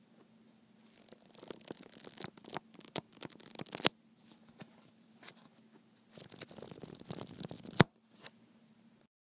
Pages flipping over very quickly.
book, pages, page, reading, read, turn, flip, paper, flick